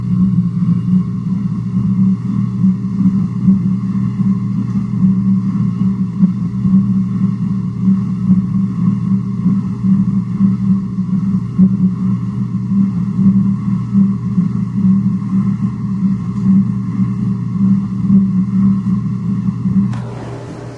kitchen ambience vase
Sounds recorded while creating impulse responses with the DS-40. Reminds me of sound inside a space helmet of someone floating down into a void on an asteroid but it's just the sound of a dishwasher from inside a glass vase.
ambiance, astronaut, kitchen, space, spooky